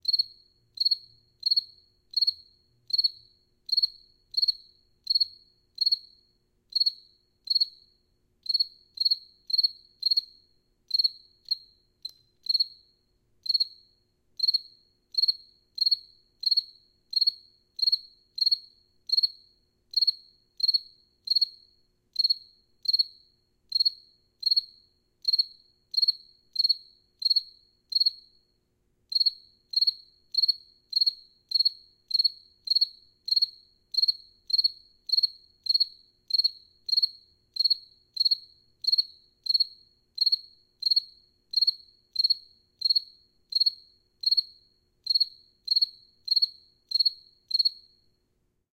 AE0096 Solitary cricket in stairwell
Recording of a single cricket which found its way into the bottom of a stairwell. The surrounding walls are cemented giving a prominent reverb. The recorder (Zoom H2) was placed about six inches away from the cricket.
insect, chirp, cheep, nature, chirping, bug, chirrup, bugs, insects